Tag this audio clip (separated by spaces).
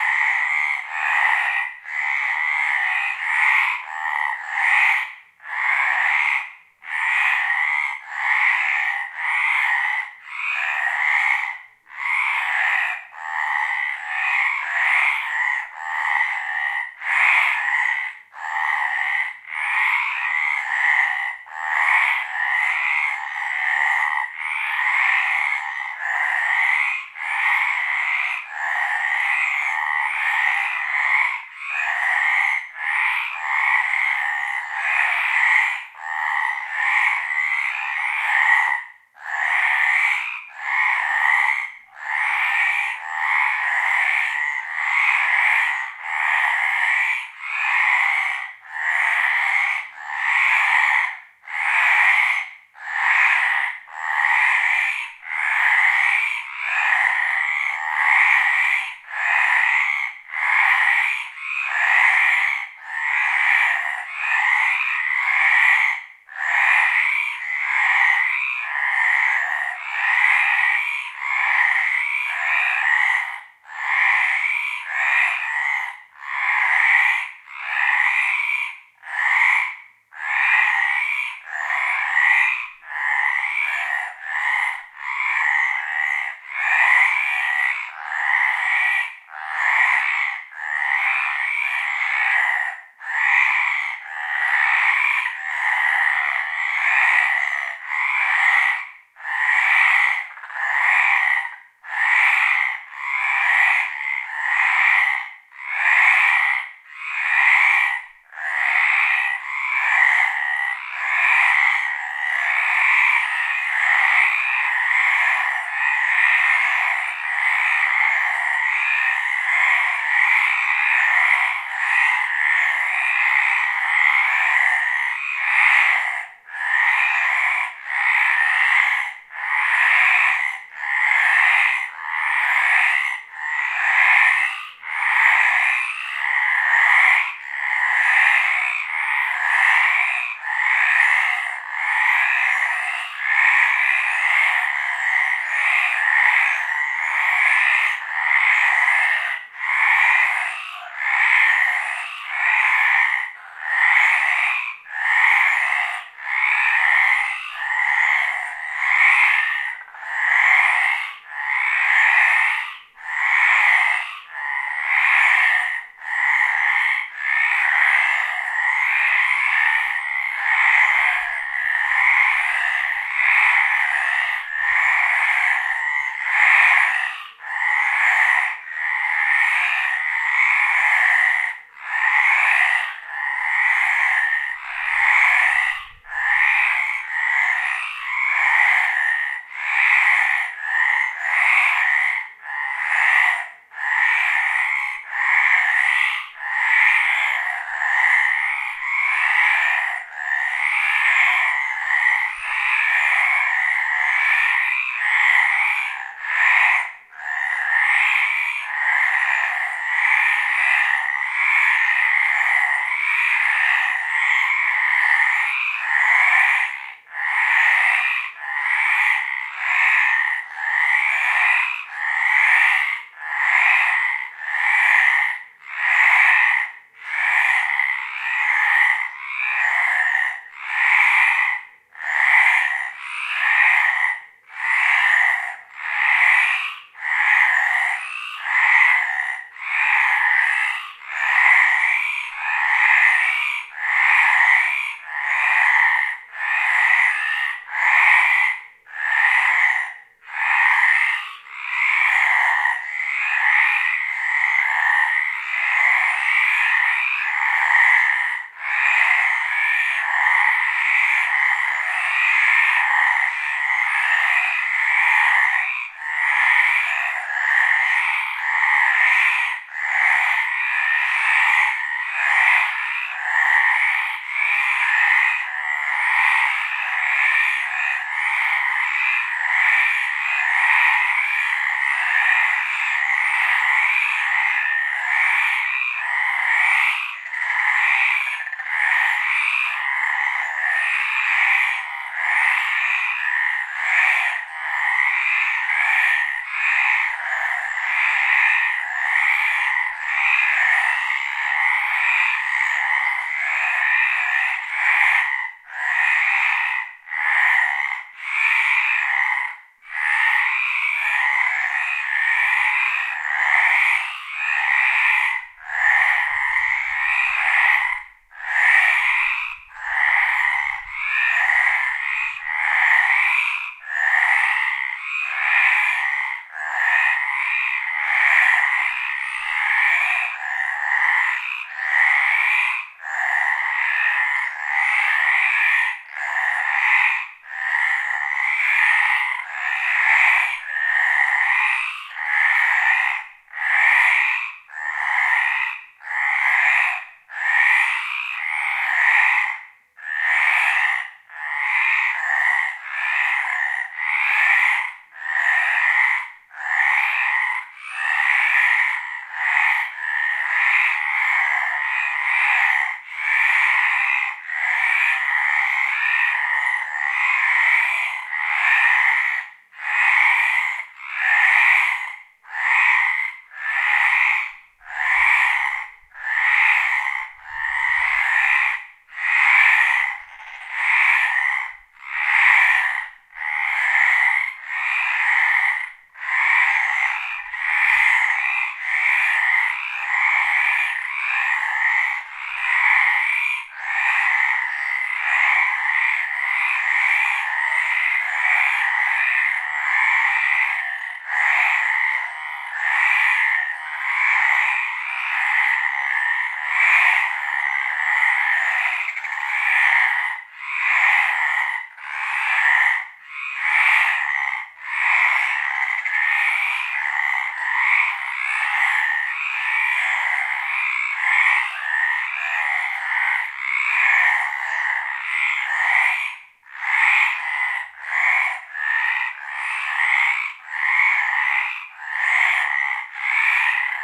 croak frogs pond